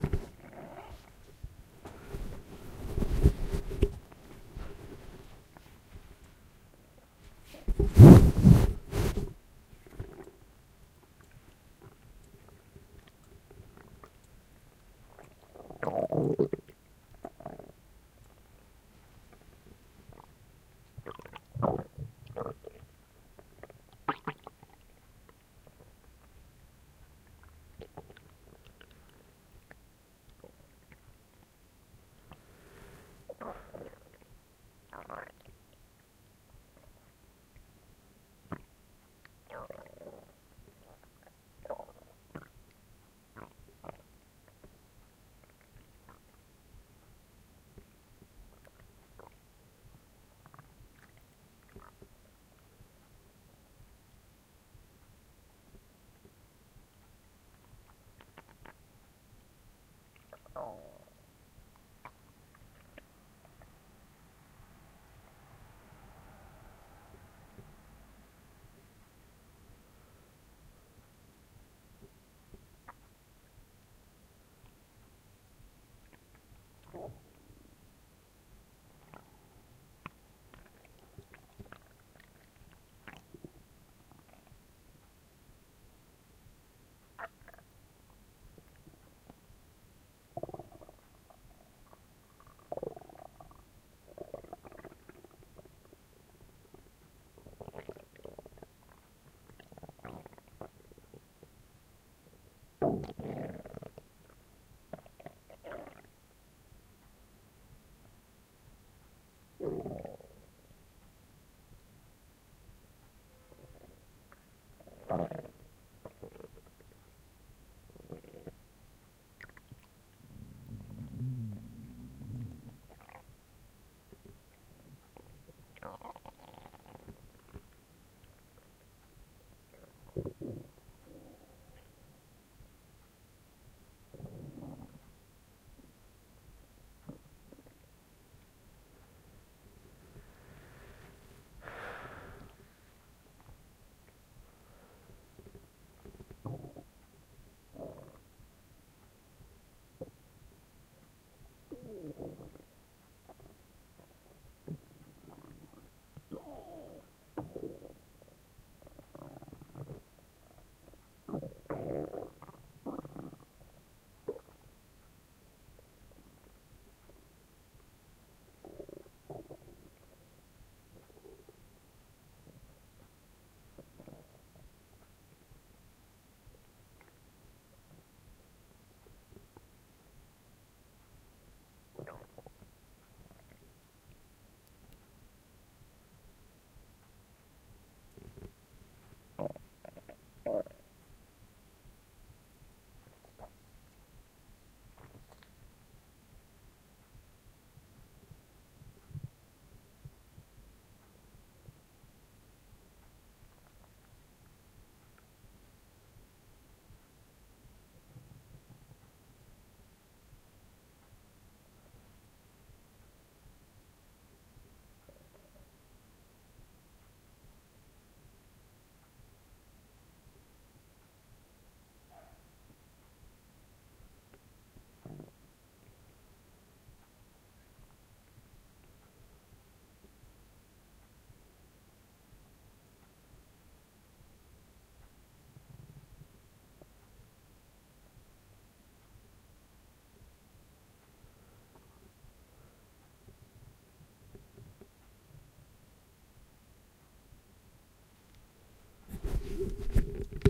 Stomach gurgling
Mid/side recording of my stomach after having a fizzy drink. I laid down and placed the Zoom H2n directly on my stomach. All kinds of stomach ejactulations can be heard in addition to my heartbeat.
Left channel is mid, right is side.
To do this manually you should separate the channels to two mono tracks, duplicate the side track and invert the duplicate. Pan the side tracks hard left and right, and mix with the mono mid channel.
Or use a plugin to do it for you :D
RUMBLE, HEARTBEAT, DIGESTING, DIGESTION, SQUELCH, BIOLOGICAL, CIRCULATION, STOMACH, BELLY, HEART, ORGANIC, BODY, HUMAN, GURGLE